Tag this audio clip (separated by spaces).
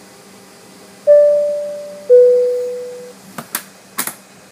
A320 airbus airplane belt cabin chime ding field-recording interphone seat seatbelt sign